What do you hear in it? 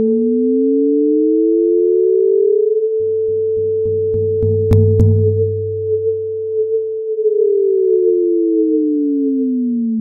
RACCA Guillaume 2019 2020 spacialship
I created a sinusoidal tone track at 440 Hz amplitude 0.8. I reduced the track to 10 seconds.
I put a reverb effect on the first 5 seconds of the track. In the last 5 seconds I put a Wahwah effect and I reduced the amplitude of 10 dB of this part of the track because my sound was saturated. I made a fondue closure on those very last seconds. I created a second sinusoidal gyrize track with parameters of frequency 220 Hz at the beginning to an amplitude of 0.2 then 440 Hz amplitude 0.3 at the end of the track. I made a fondue closure. This second track lasts 3 seconds. I created a risset battery track with the default settings. I found that the noise corresponded well to the transition that I wanted to give between the 3 and wanting to give a "defective machine" effect to my sound, I took my track with the tweeting effect and I duplicated it. I then reverse the direction of this track to paste it at the end of my wahwah effect.